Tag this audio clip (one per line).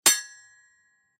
Heavy
hit
impact
metal
Sword
Sword-hit